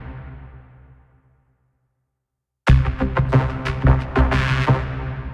Drum Beat PACK!
beat, drum, pack